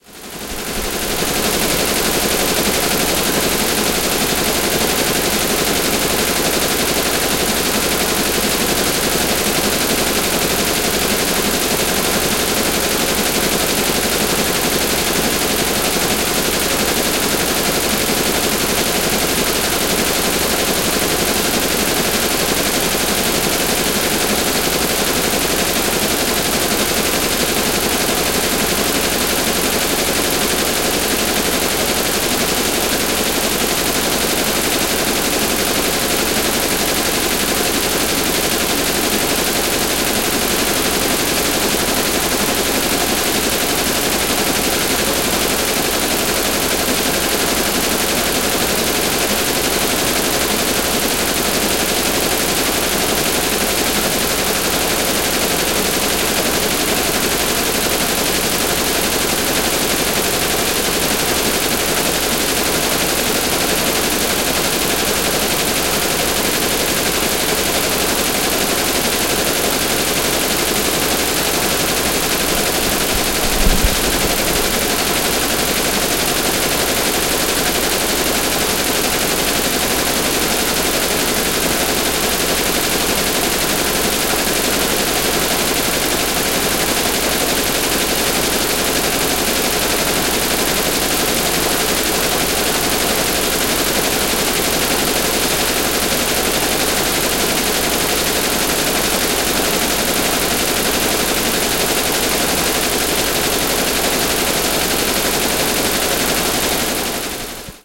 When my band was allowed to record after-hours in an industrial space in West Oakland, I took the opportunity to show up early to record some of the machines in action. This is a recording of an industrial embroidery machine as it sews on logos to eight sweatshirts. Unfortunately my recording of the glass etching machine in another part of the building didn't turn out as useful--it sounded pretty crazy. Enjoy! AudioTechnica AT22 > Marantz PMD660 > edited in Wavelab